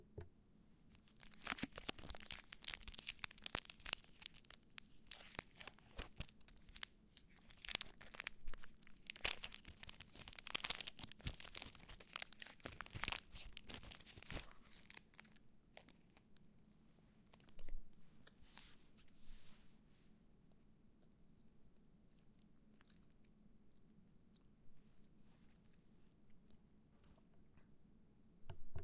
Opening Snickers
Recording of me opening a snickers bar.
candy, snickers, wrapper